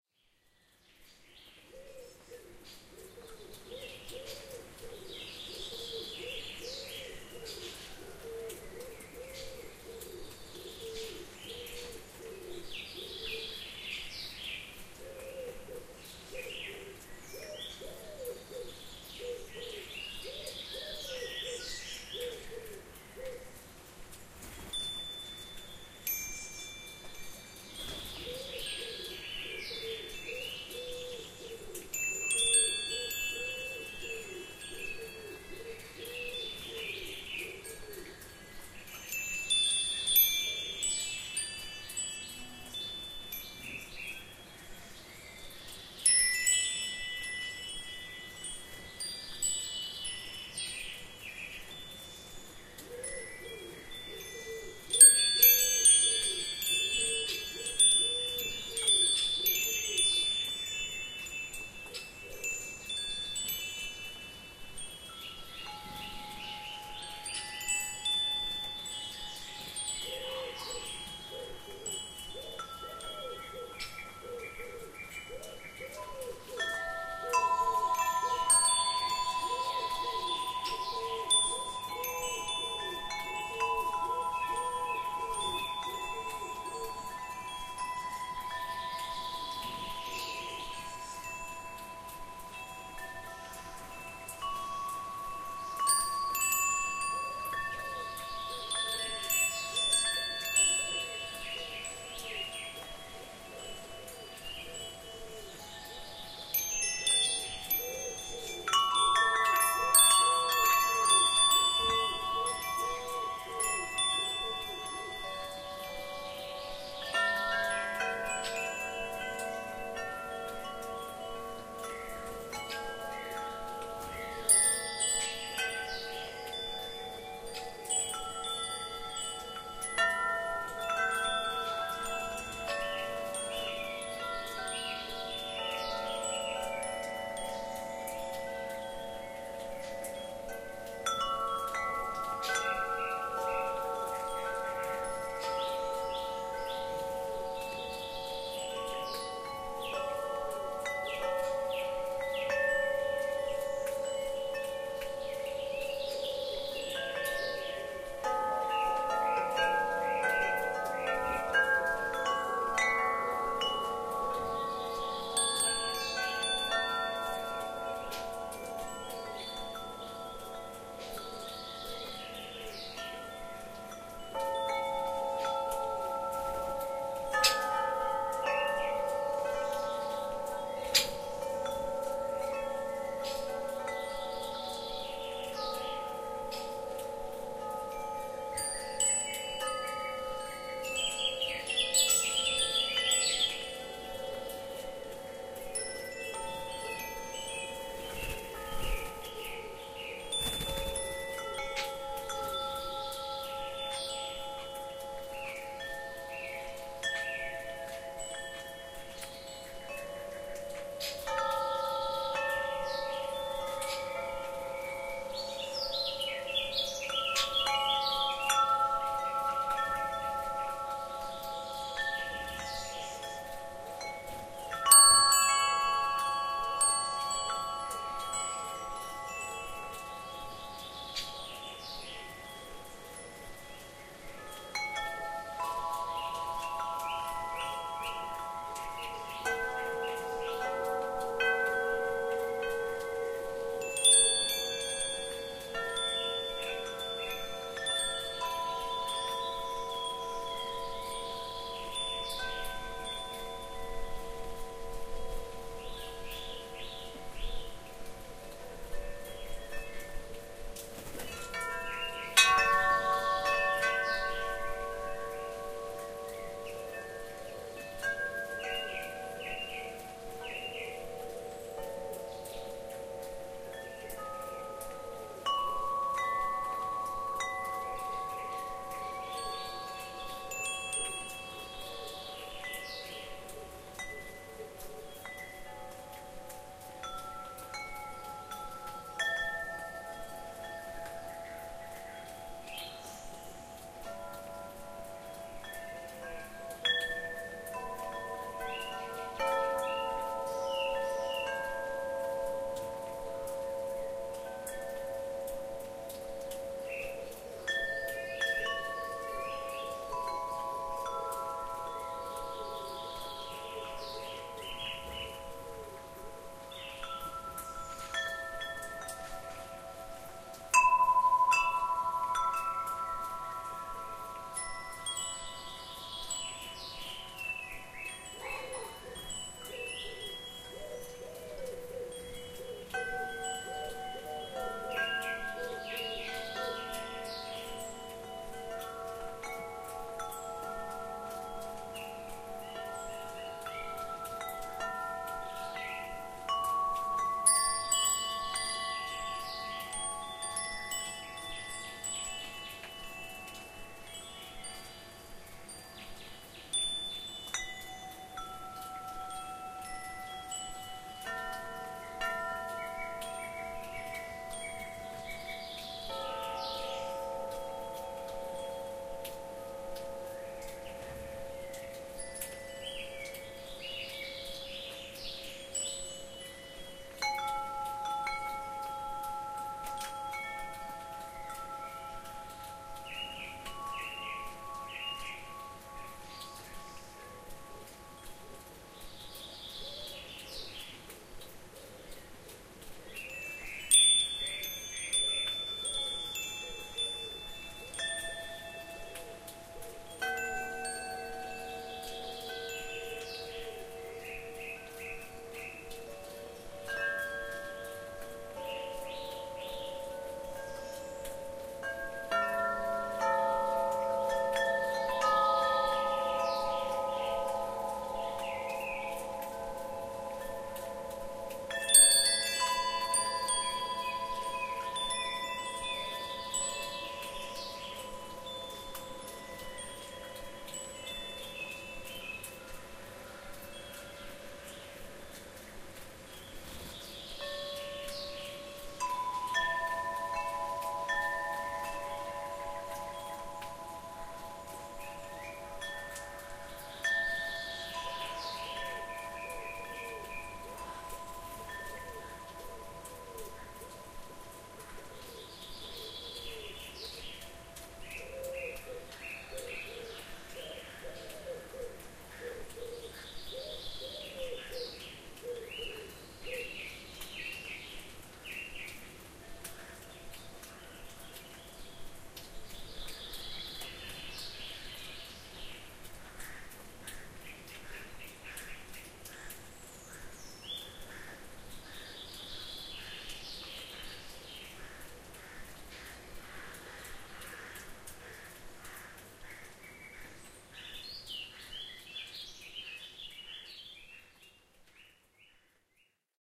Muchty Chimes x3
Three sets of windchimes (small, medium and large) recorded in the back garden of a country cottage near Auchtermuchty, Fife (Scotland). Singing and fluttering birds can also be heard in the background. Recorded on Zoom H4n
bird-song, birdsong, country, magical, wind-chimes, windchimes